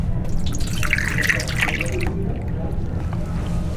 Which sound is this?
water coming out of a drinking fountain... it is not a saw.